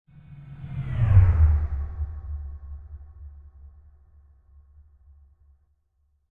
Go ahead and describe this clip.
Space ship
Remixing, space